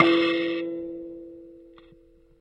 96kElectricKalimba - N1harmonic
Tones from a small electric kalimba (thumb-piano) played with healthy distortion through a miniature amplifier.